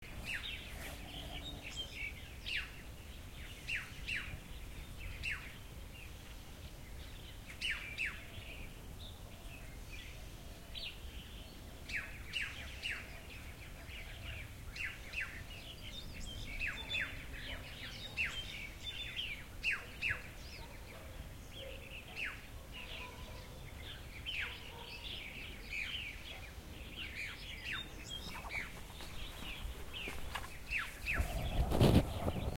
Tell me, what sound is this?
Stereo recording in a farm on iPhone SE with Zoom iQ5 and HandyRec. App.
ambience, farm, field-recording, nature